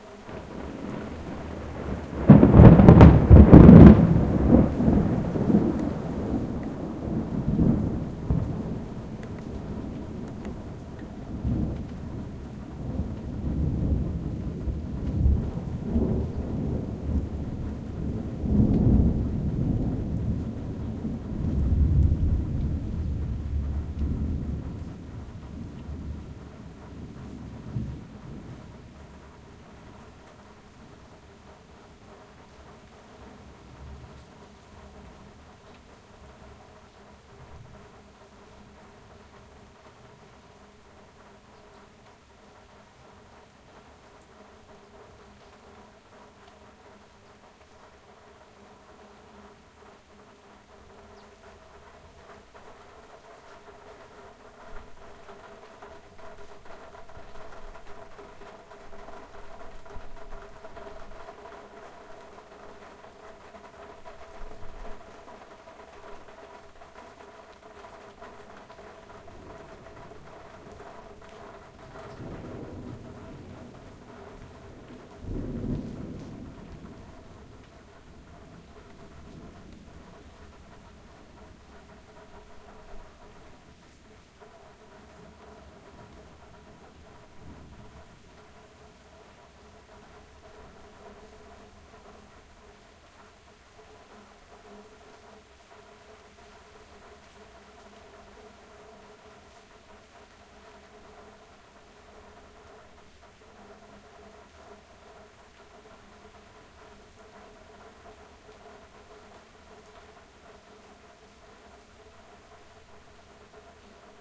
Rain-Light 01
Light rain recorded at Santa Marta, Colombia
Colombia, Lightning, Marta, Rain, Santa, Thunder, Weather, drops, light, raindrops